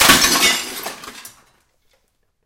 crash klir
One of a pack of sounds, recorded in an abandoned industrial complex.
Recorded with a Zoom H2.
city, clean, field-recording, high-quality, industrial, metal, metallic, percussion, percussive, urban